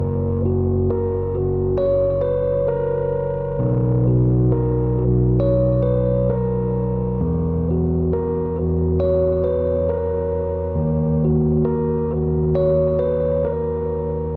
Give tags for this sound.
dark atmosphere lonely electric piano loop ambient moody rhodes distortion

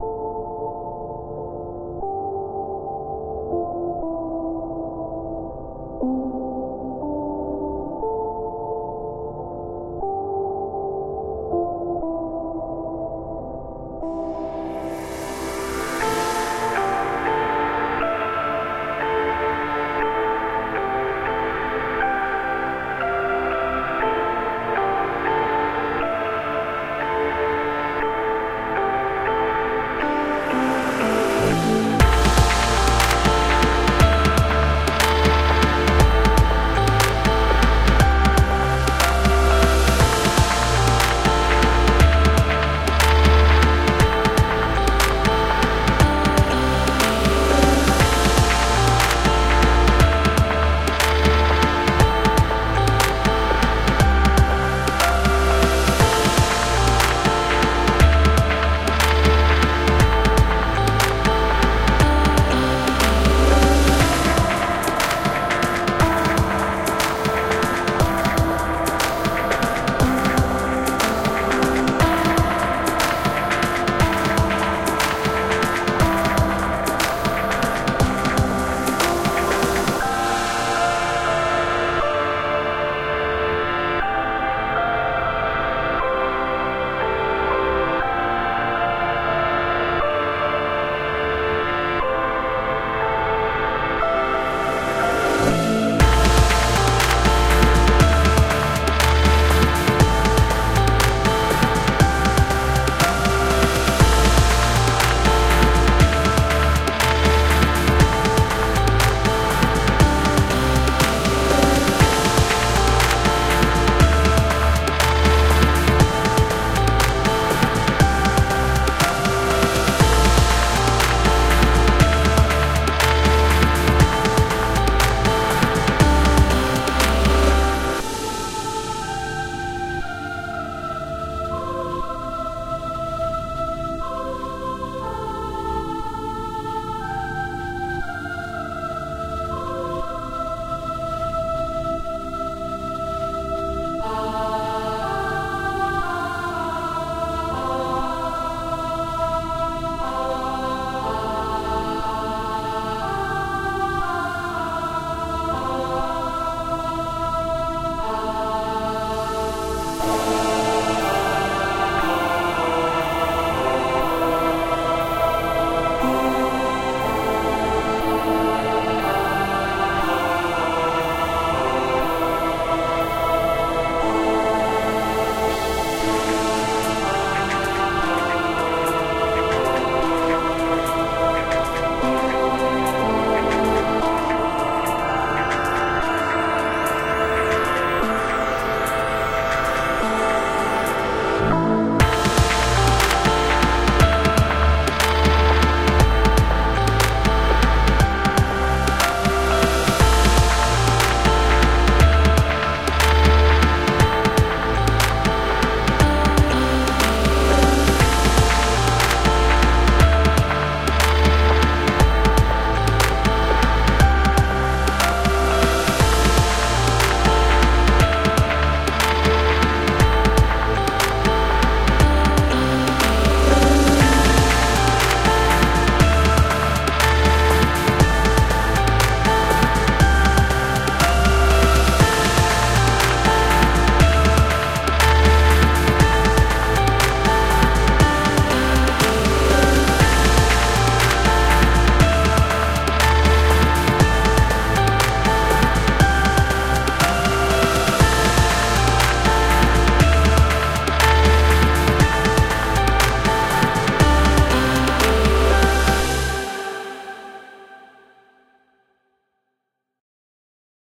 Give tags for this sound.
dramatic loop movie

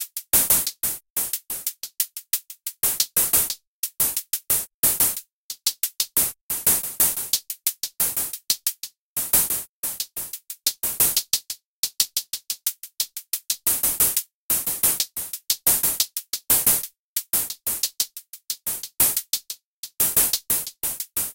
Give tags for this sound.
90bpm electronic hihat loop

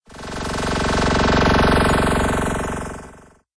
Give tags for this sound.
cartoon film

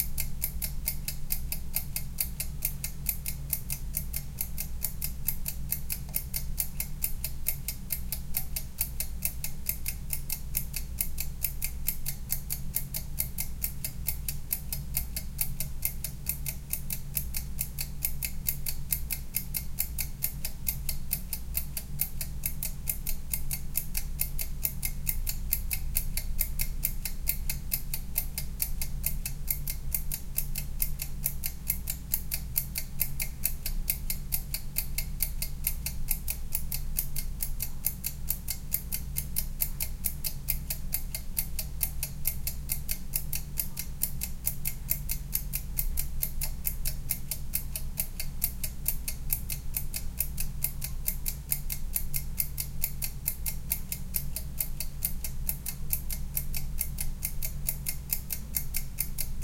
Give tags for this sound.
tock minute timer time loop seconds clock ambiance seamless kitchen tick

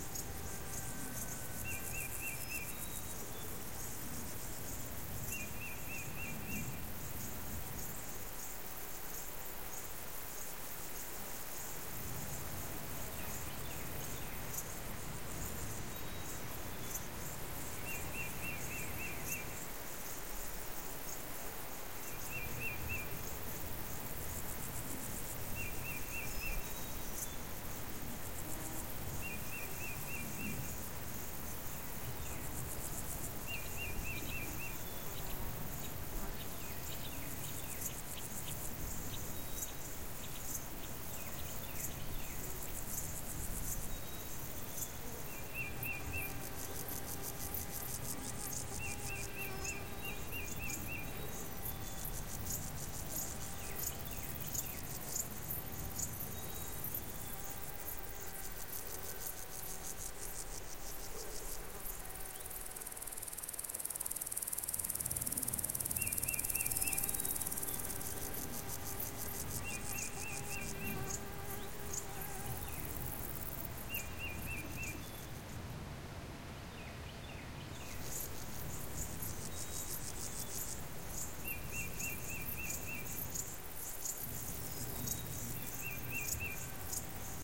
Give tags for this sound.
ambient atmosphere birds forest insects leaves loop loopable river soundscape

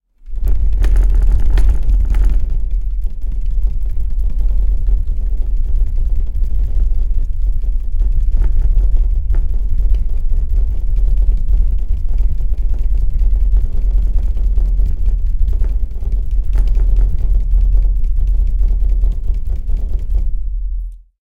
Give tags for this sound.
earthquake OWI Shake Shaking Table Vibration

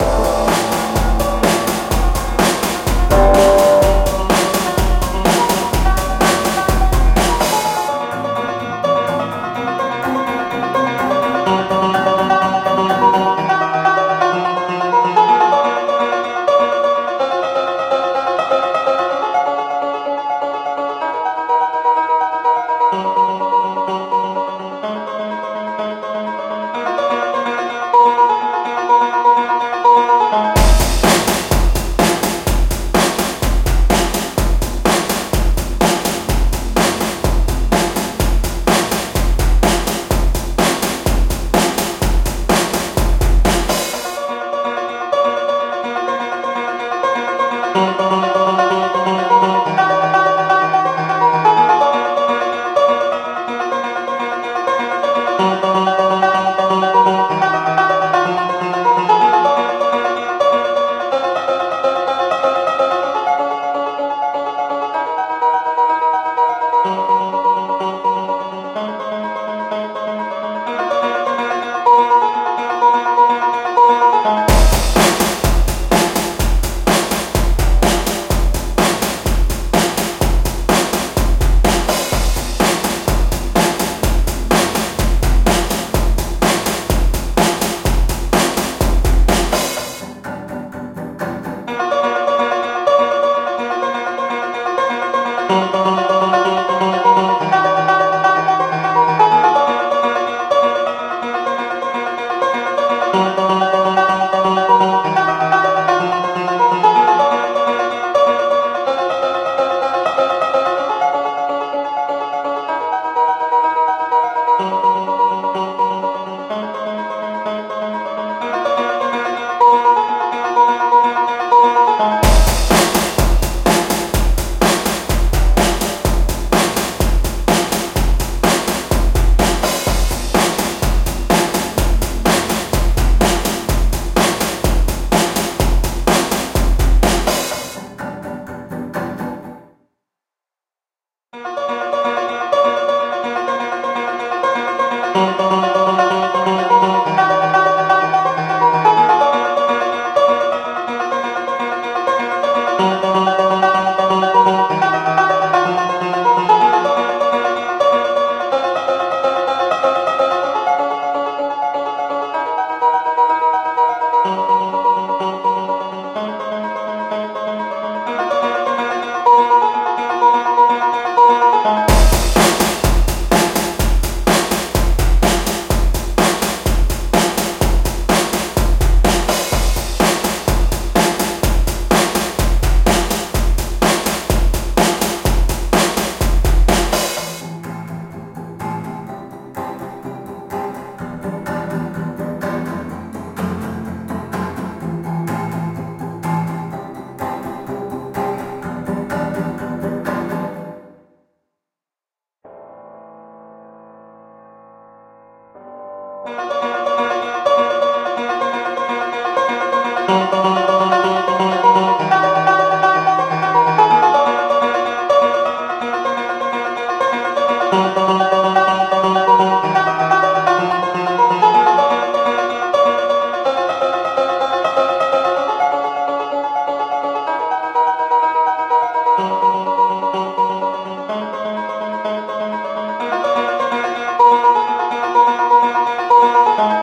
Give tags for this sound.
B Baroque Classical Gospel Indie Jazz Melodic New-Sound Polyphonic R Rock

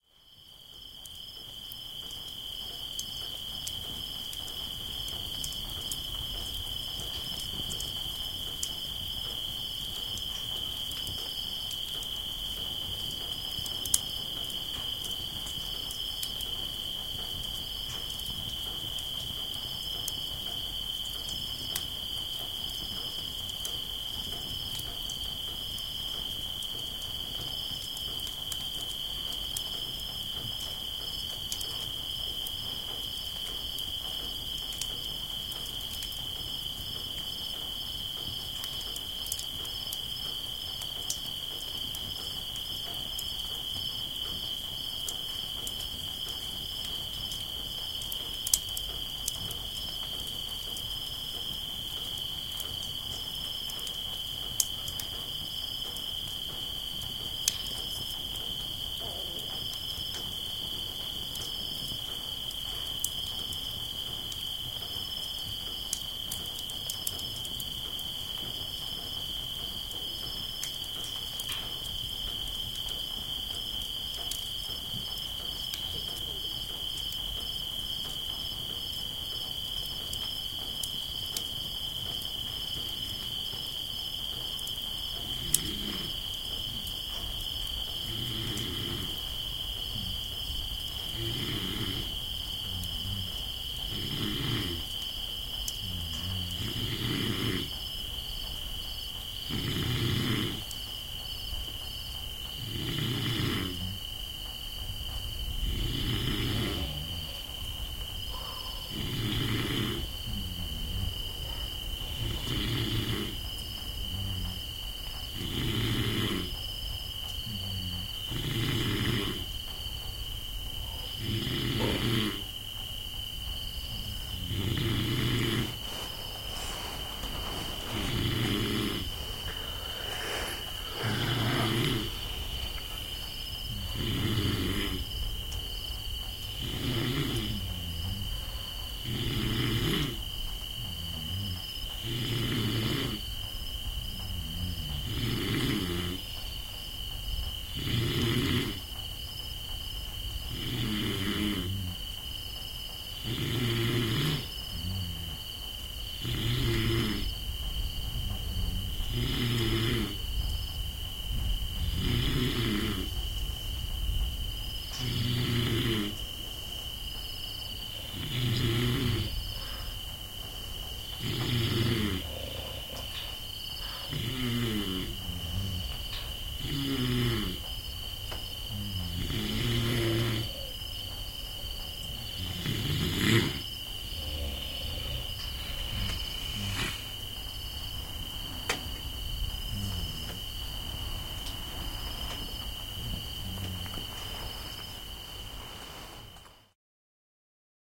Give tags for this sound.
crickets nature snoreing field-recording sleeping night insects

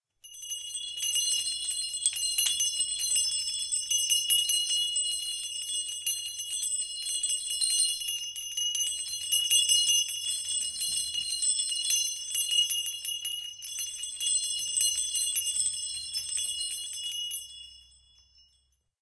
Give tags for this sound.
bells thai thai-bells

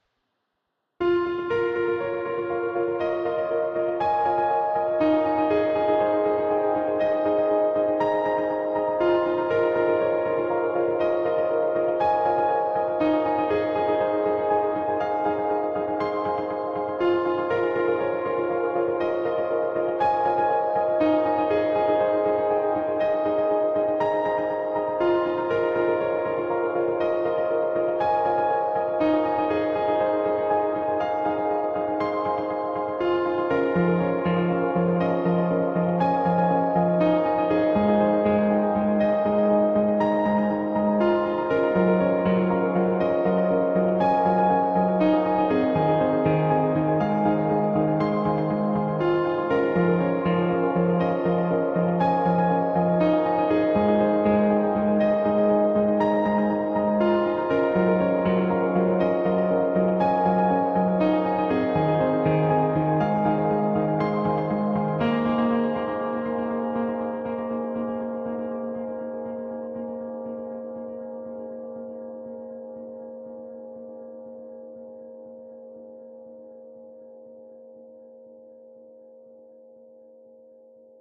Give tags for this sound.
Ambient Dreamscape Wave

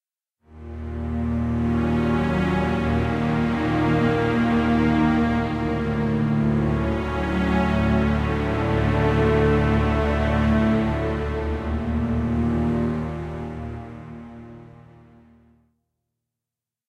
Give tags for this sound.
strings dramatic movie background-sound mood atmosphere thriller drama dark cinematic thrill story hollywood spooky background pad deep trailer film horror soundscape suspense music ambience ambient scary drone

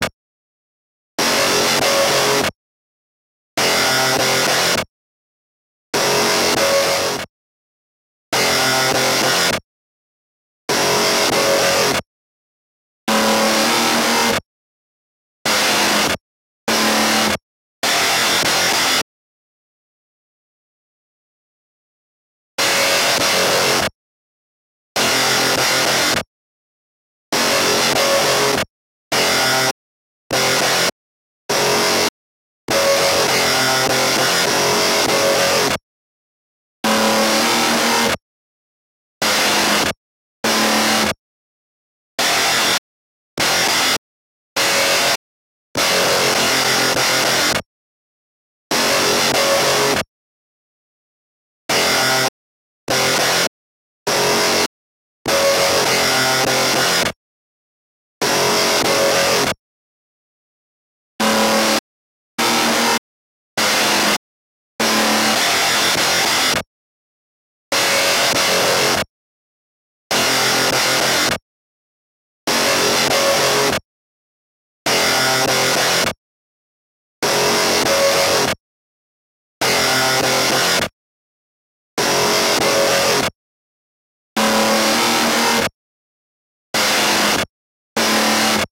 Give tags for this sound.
100-bpm distorted-guitar

